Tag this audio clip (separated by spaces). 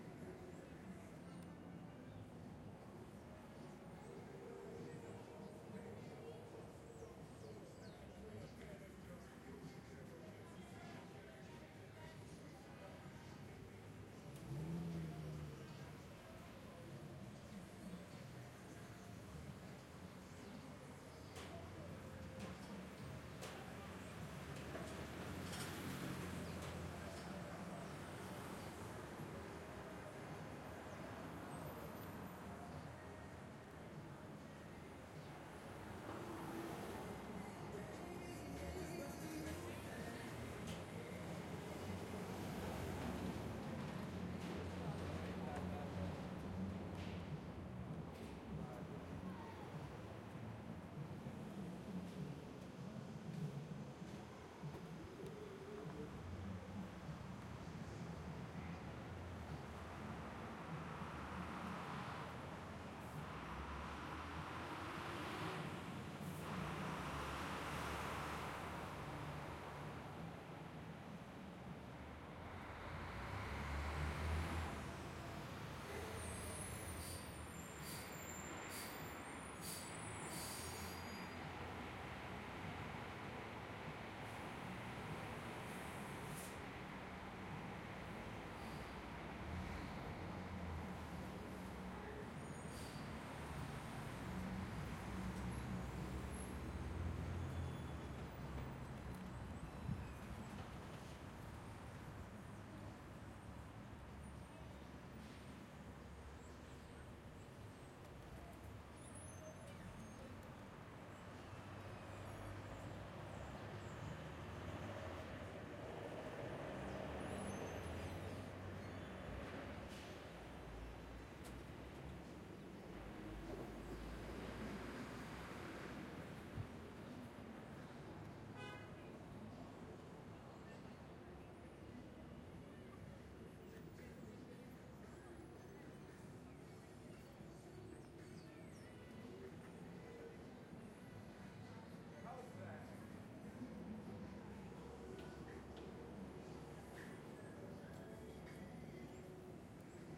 city new-york new-york-city nyc train voices